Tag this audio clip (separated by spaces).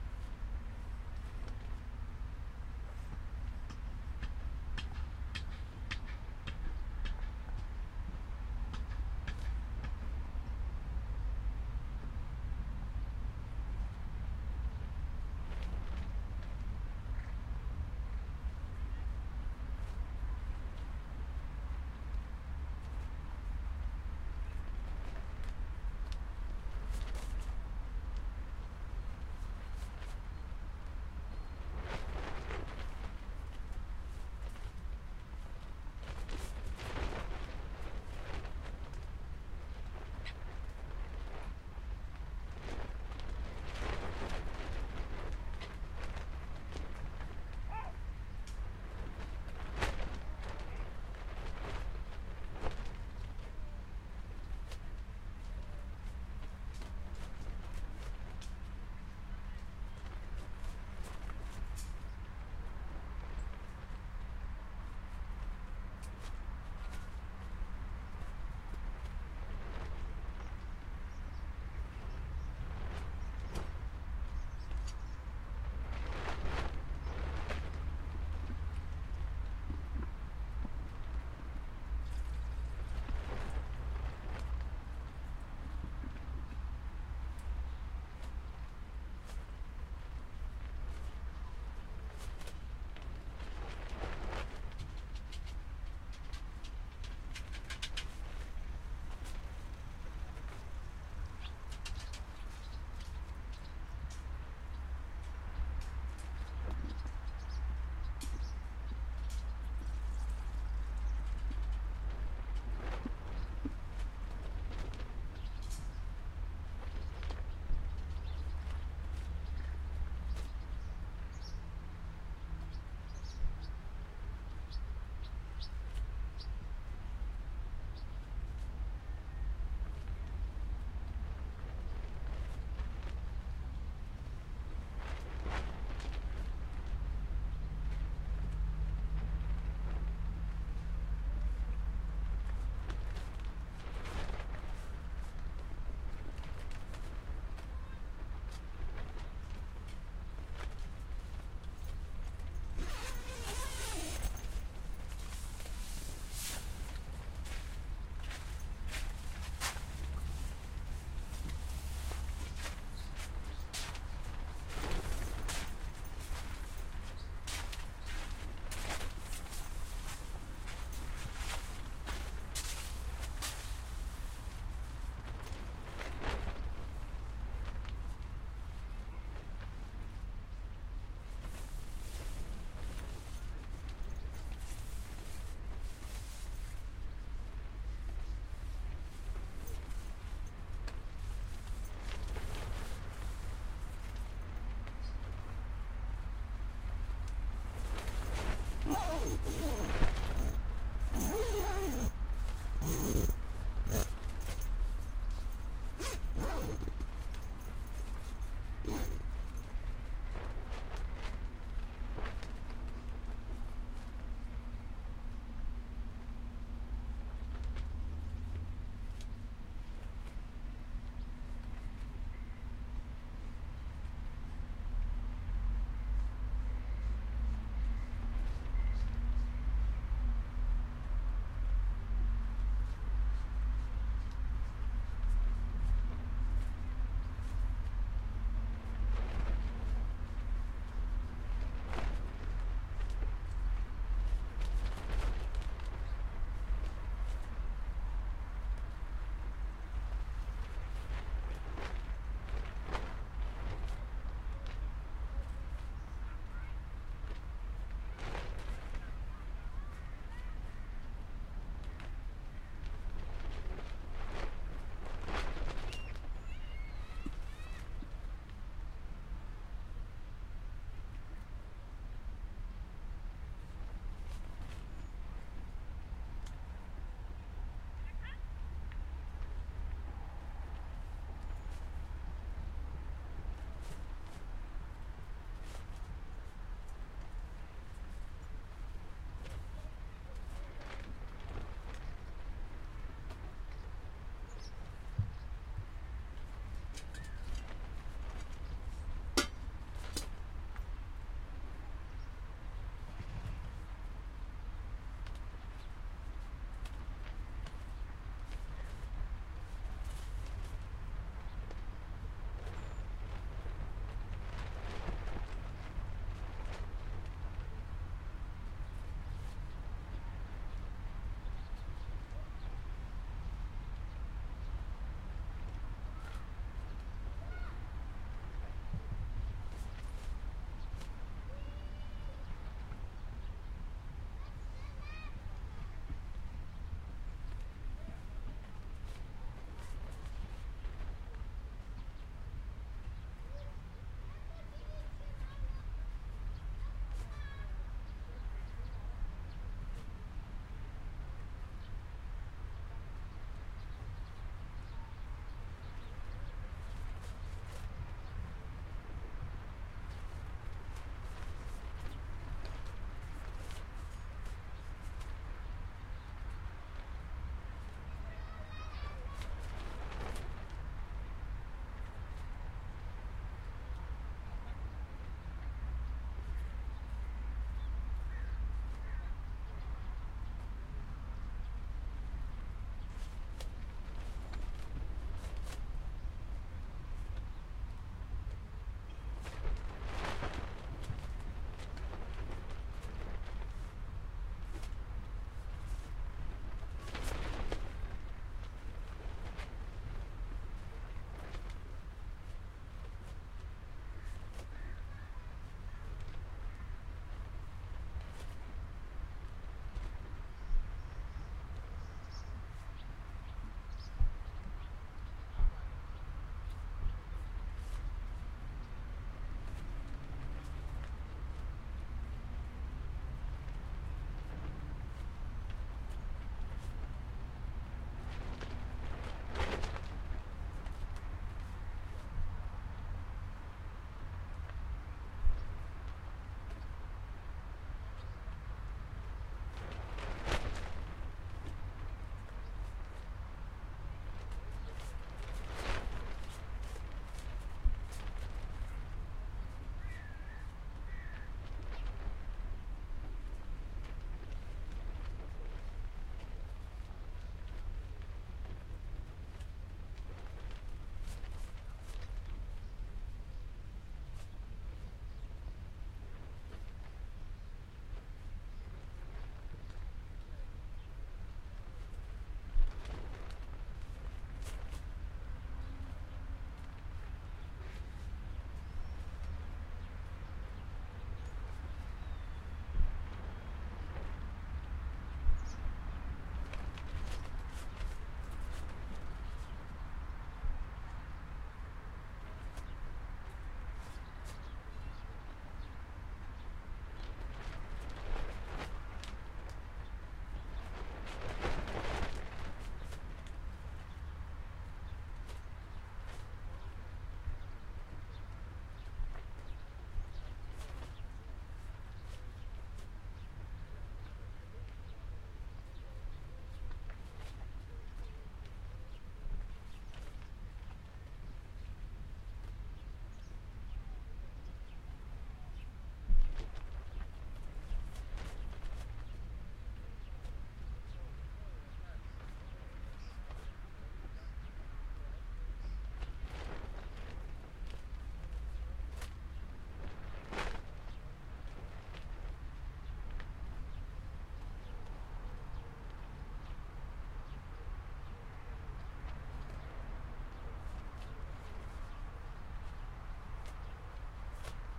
traffic people camping Tent cars Copenhagen charlottenlund coastline wind camp